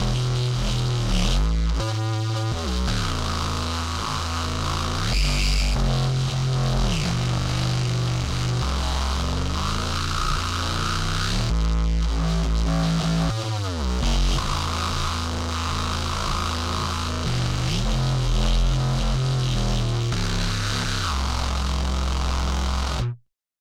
Distorted reese bass
Very much distorted, almost atonal sounding synth bass.